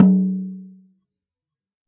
TT10x8-MP-Hd-v01
A 1-shot sample taken of a 10-inch diameter, 8-inch deep tom-tom, recorded with an Equitek E100 close-mic and two
Peavey electret condenser microphones in an XY pair.
Notes for samples in this pack:
Tuning:
LP = Low Pitch
MP = Medium Pitch
HP = High Pitch
VHP = Very High Pitch
Playing style:
Hd = Head Strike
HdC = Head-Center Strike
HdE = Head-Edge Strike
RS = Rimshot (Simultaneous Head and Rim) Strike
Rm = Rim Strike
drum, velocity, 1-shot, multisample, tom